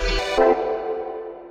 click sfx4b
This is a pack of effects for user-interaction such as selection or clicks. It has a sci-fi/electronic theme.